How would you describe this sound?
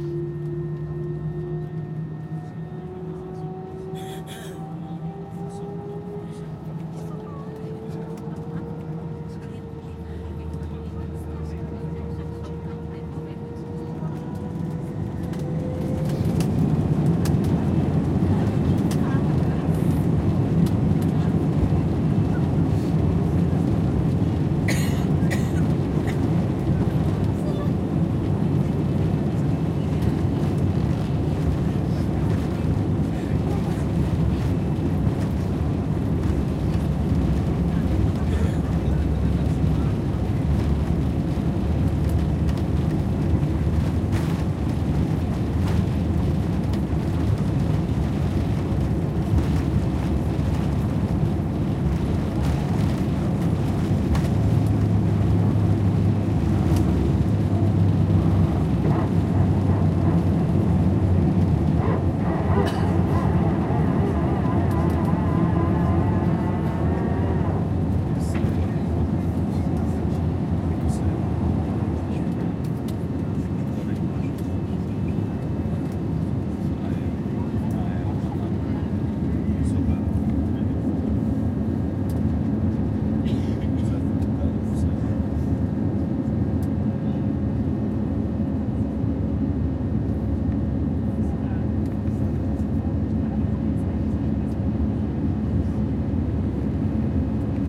aviao decolagem
recorded inside a plane on takeoff (v2)